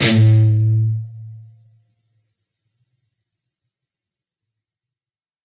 Dist Chr A oct up pm
A (5th) string open, and the D (4th) string 7th fret. Up strum. Palm muted.
distorted, rhythm-guitar, distortion, chords, distorted-guitar, guitar, guitar-chords, rhythm